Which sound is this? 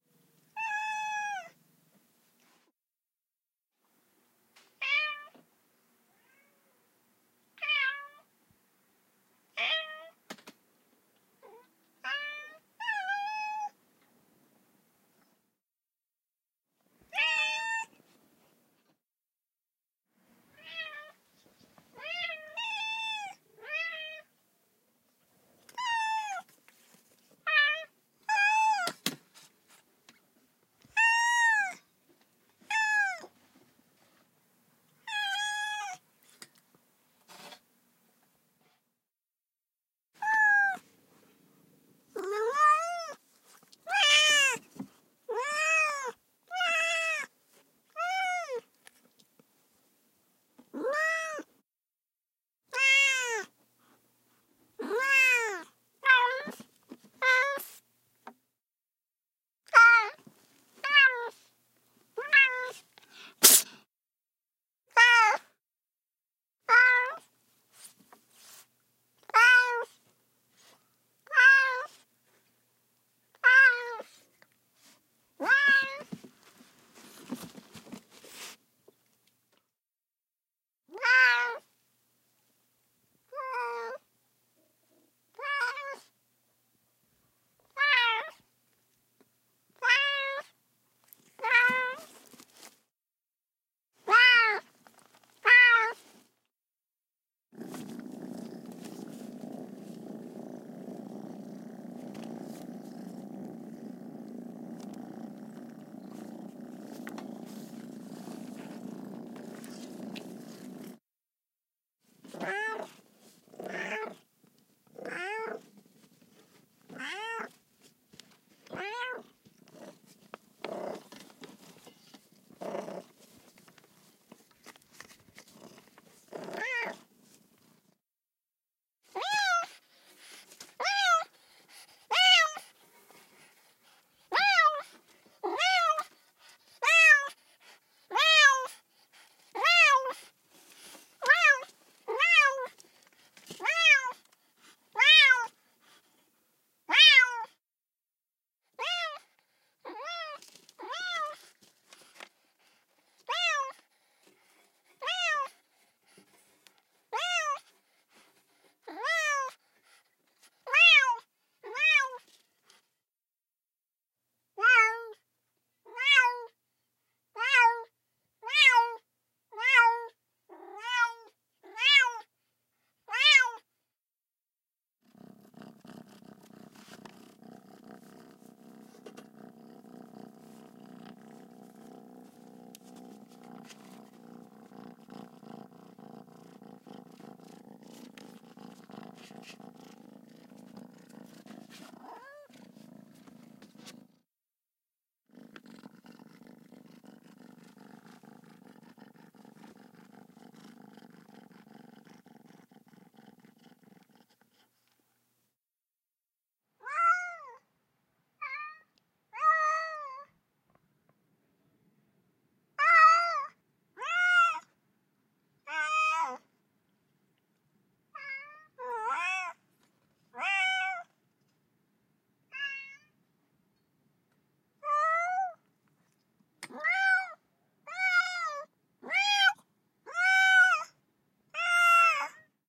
A bunch of individual (and pairs) of cats meowing and making noise in a crate.